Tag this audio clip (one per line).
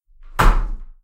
cut
development
field-recording
game
games
gaming
hit
horror
impact
longsword
skallagrim
wood